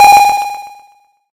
A rough 8 bit pause sound effect
retro, chip, 8bit, 8-bit, bit, beep, pause, 8, arcade, chiptune